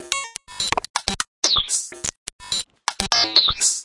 Elctroid 125bpm02 LoopCache AbstractPercussion
Abstract Percussion Loops made from field recorded found sounds